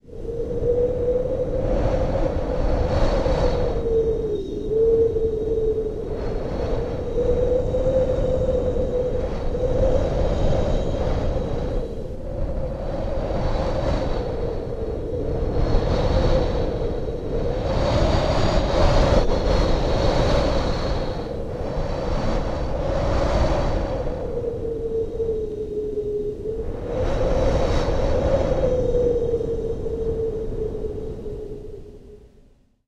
Wind strong JPN
Stronger version of wind in winter. Might go nicely with smatterings of rain.